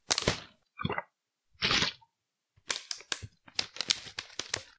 among us kill homemade with water bottle
This is my attempt at among us kill sfx (homemade with water bottle).
imposter, kill, among-us, impostor